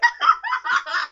A person laughing hysterically. (me) Yeah, my laughter was (not necessarily is)that high. Recorded with a CA desktop microphone.